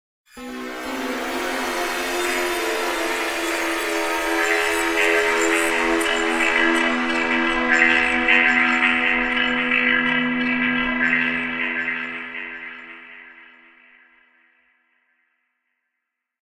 I made this with my keyboard and some pre-set synths on GarageBand. I think it has a really video game vibe to it, almost like something from System Shock or Half-Life or something like that.